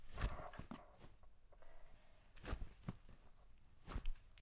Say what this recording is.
small gun pulled from under cloak 1 dry
Foley effect of an old gun being withdrawn from under a cloak.
Sound by Ethan and Malcolm Galloway
gun, blunderbus, antique, dry, old, historical, medieval, foley, cloak, musket